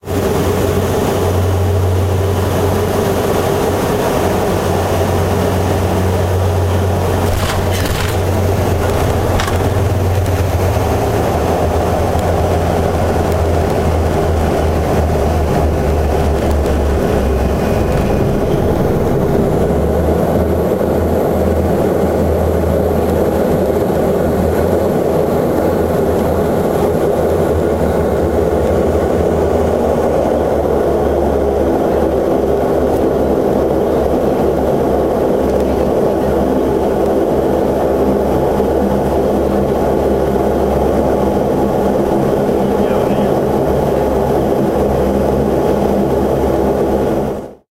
SAAB 340 Touchdown and reverse pitch
Interior recording in a SAAB 340 Regional airlier as the plane touches down and reverse pitch is applied. Landing thump is 7 seconds in, with reverse pitch at :22, followed by long taxi.